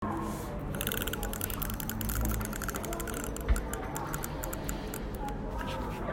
The sound of a corrector on a paper.
exams, study, university